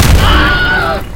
This is a sound that I mixed two other sounds together: